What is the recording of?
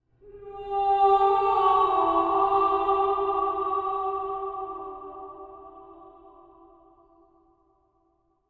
A short choral sample. Part of my atmospheres and soundscapes pack which is designed for use as intros/fills/backgrounds etc.
ambience, atmosphere, choir, choral, church, electro, electronic, music, processed, synth, voice